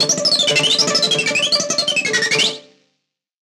Angry Robot Bird
annoyance, arguing, bird, cartoon, comical, creak, distorted, erratic, fighting, flailing, funny, furious, game, hungry, insane, irritated, joke, laugh, laughter, loud, machine, mad, noisy, robot, robotic, screech, shouting, voice